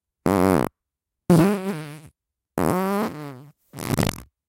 FART SOUND 44
Zoom H1n fart